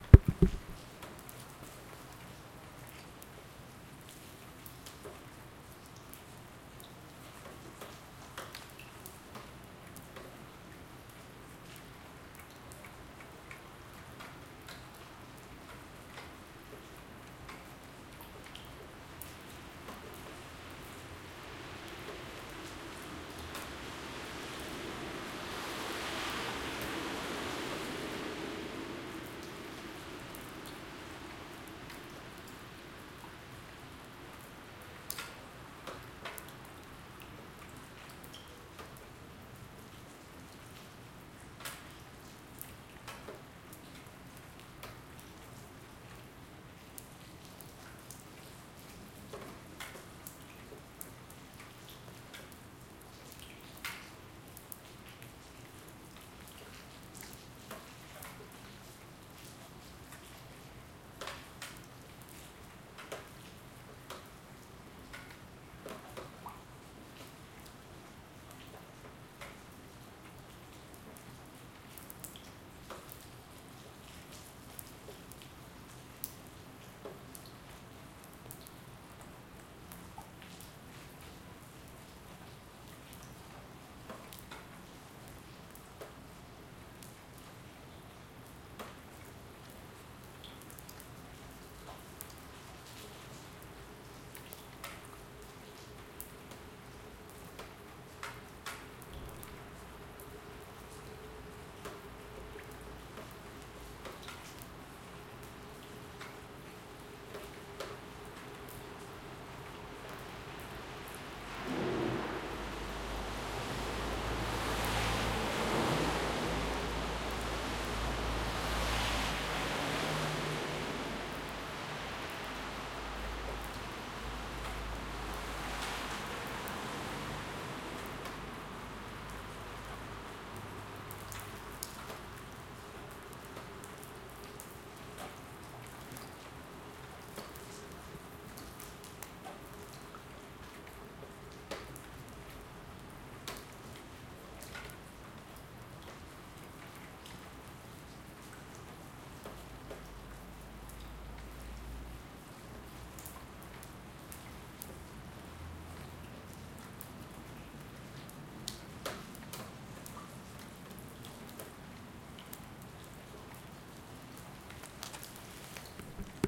rain drops at night
drops, field-recording, nature, night, rain, water, weather